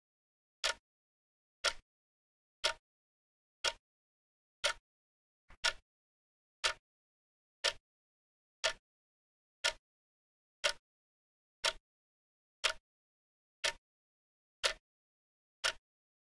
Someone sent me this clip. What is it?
Small Analog clock ticking. Recorded with condenser microphone. No background noise/"white noise".